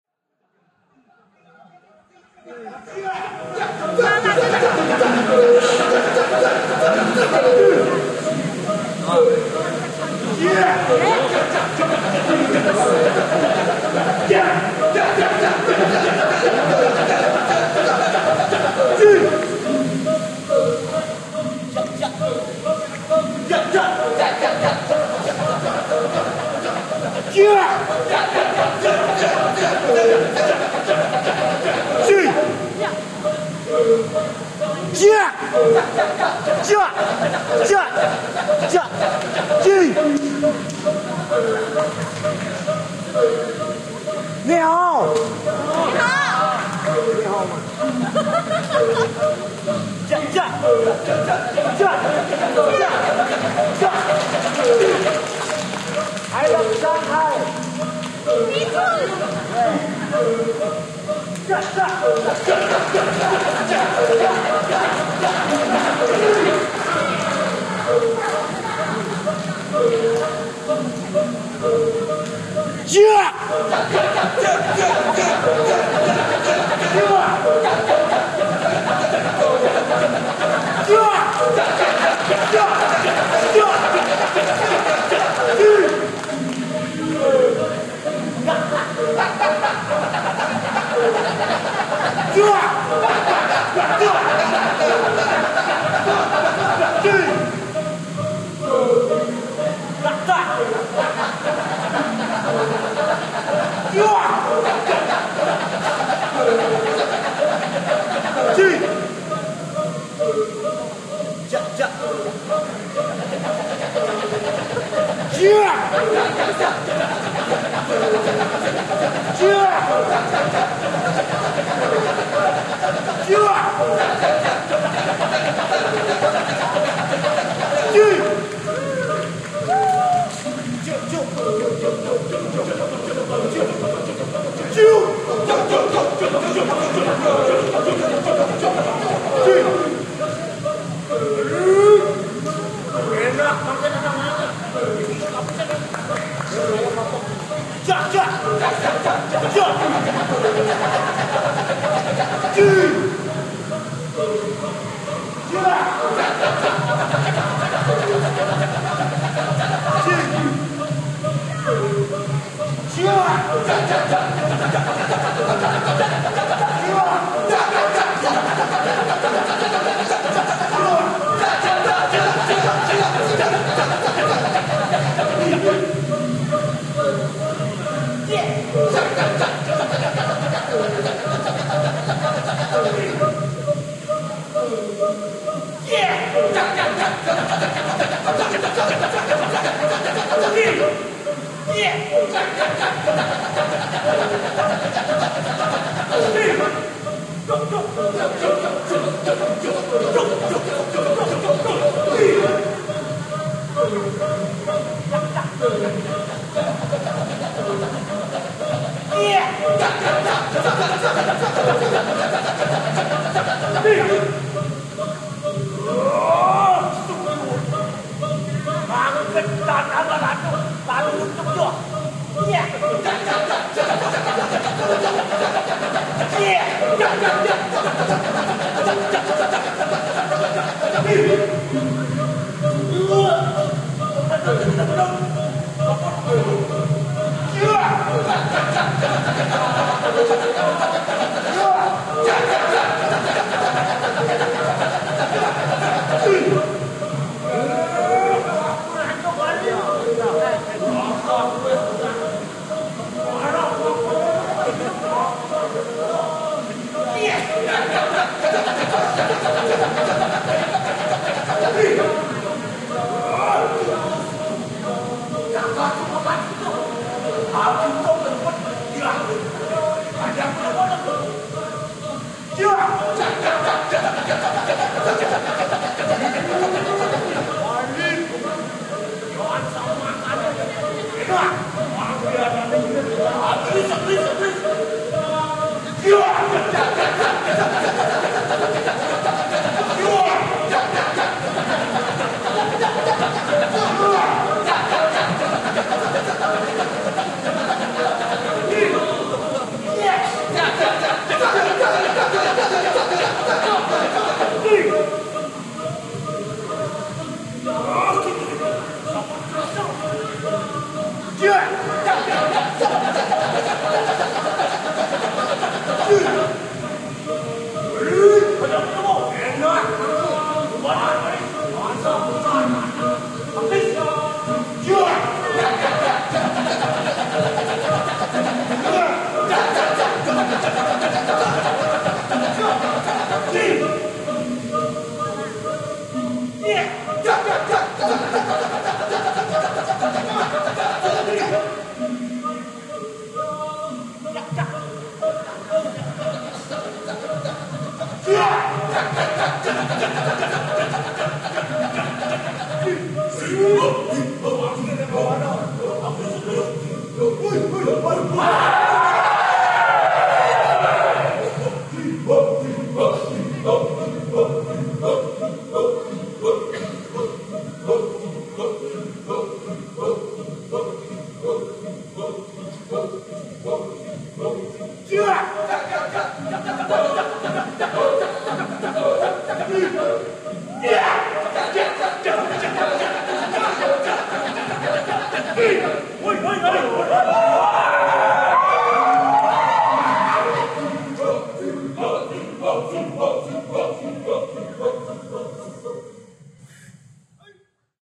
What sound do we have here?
This is the result: Equalized, spatially widened, and noise floor'd.